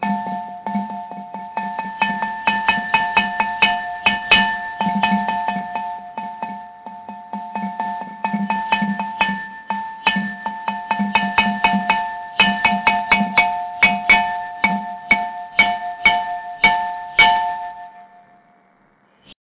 Glass Vase Fast (Accoustic)
Hit a Glass Vase with my Finger(s), mostly Ankle has some nice Accoustic.
-Fast Hits
fast, glass, hit